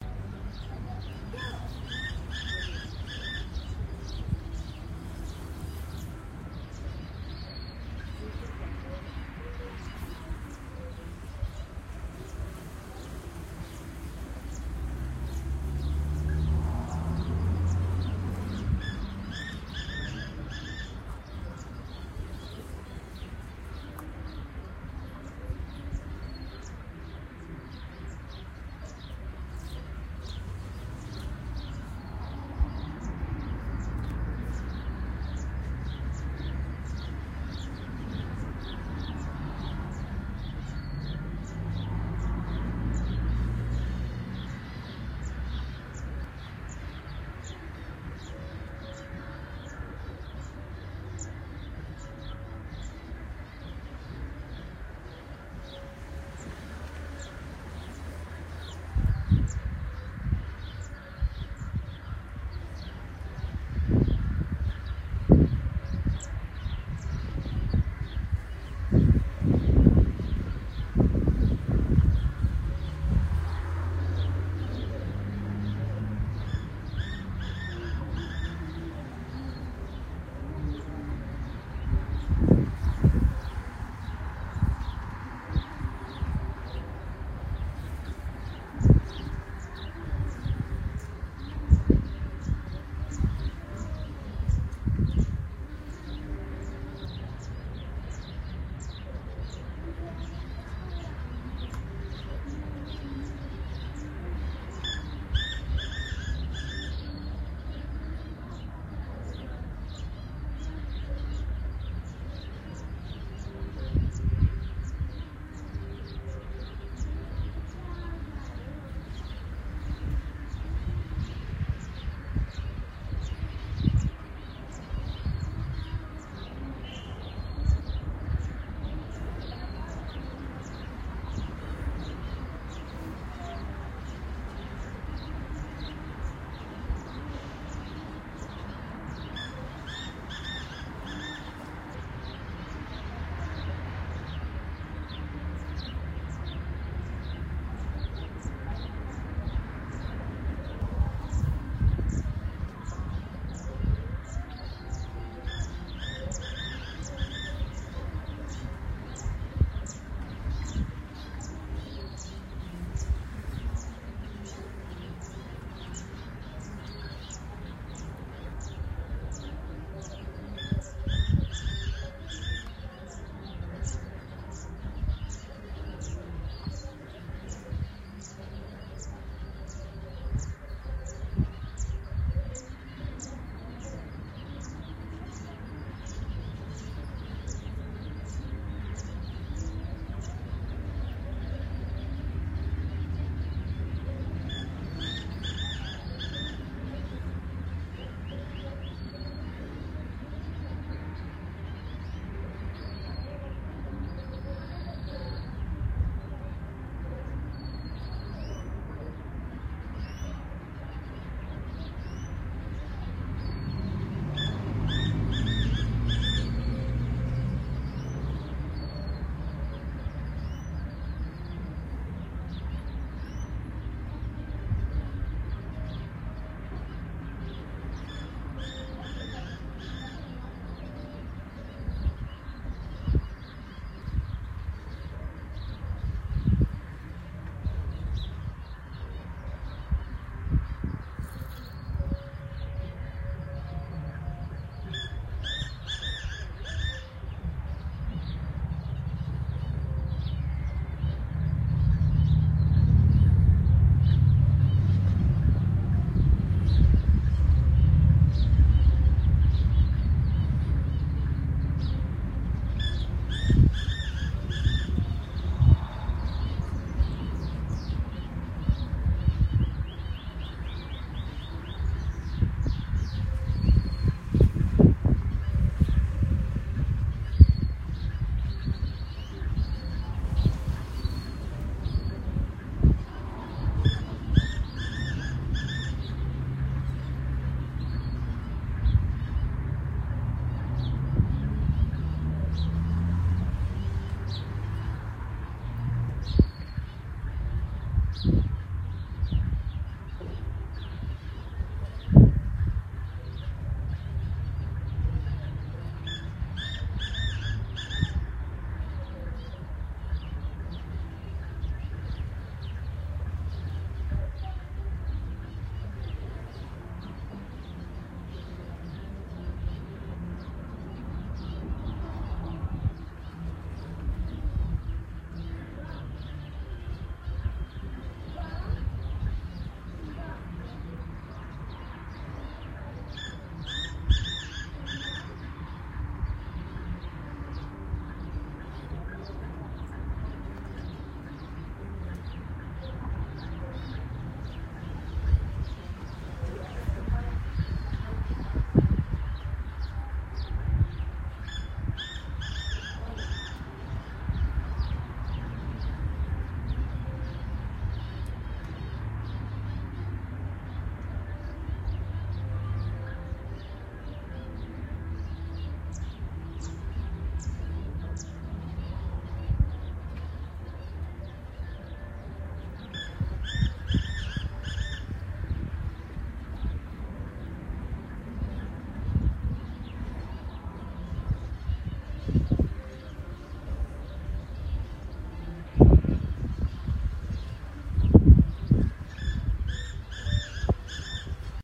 Black Francolin Larnaca
The call of a black francolin is a sound I wanted to record for many years, and yesterday I was lucky to find one in a near enough range.
I was sitting at a restaurant, and I heard one calling in the near by trees.
Also some other birds can be heard domestic and wild, some wind sound, a bit of traffic and maybe some voices in the distance.
Recorded with an iphone xs and the application just press record.
birds, black-francolin